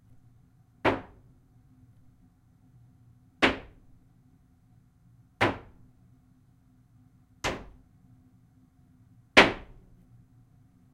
HAMMER HIT VARIATIONS 1-2
Variations of Hammer Hitting Wood
Hammer-hit, smash, wood